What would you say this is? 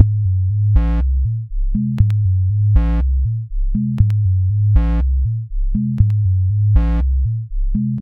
bassline c 120bpm-01
bassline c 120bpm
120bpm, acid, ambient, bounce, dance, dub-step, electro, electronic, house, loop, rave, trance